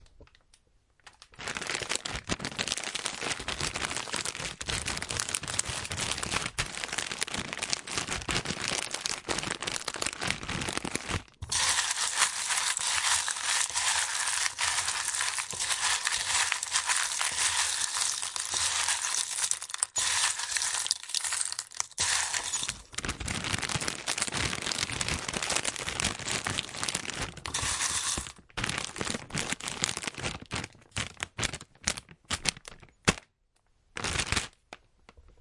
Content warning
The recording was made at my home for the purpose of creating a sound design for a short animated film.I recorded these sounds on my Zoom h5.
a, bag, bowl, foil, peanuts, pull, rip, ripping, rustle, tape, tear, tearing, velcro